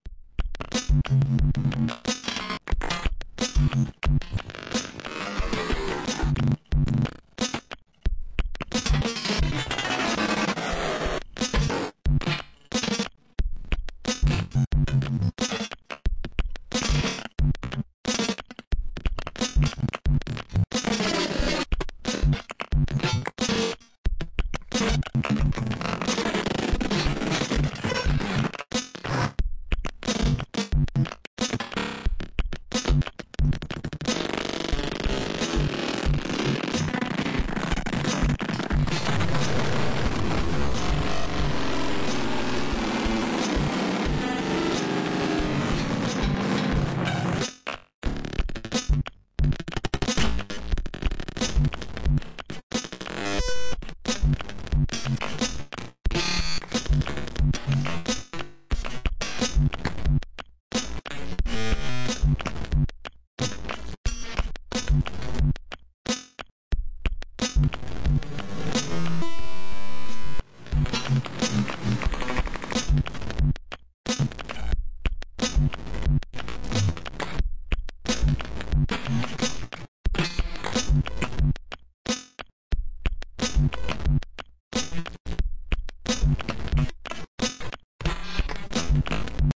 One in a small series of weird glitch beats. Created with sounds I made sequenced and manipulated with Gleetchlab. Each one gets more and more glitchy.
bass, beat, click, drum, electronic, glitch, snare, sound-design, weird